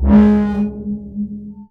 Some of the glitch / ambient sounds that I've created.
glitch, reaktor